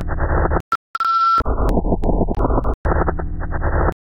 static and backwards samples beeps
clicks, lowfi, humming, machine, computer, modem, glitches, sample